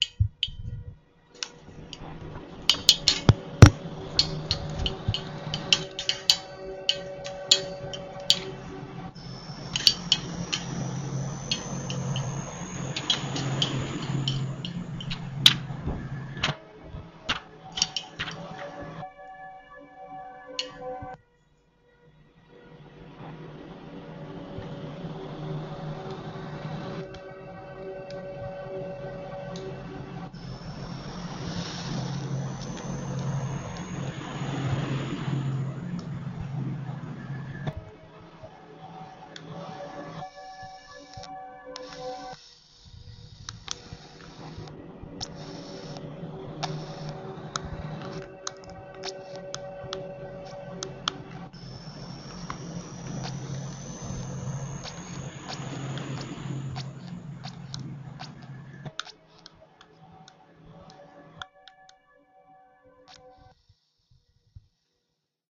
ambient with micro contact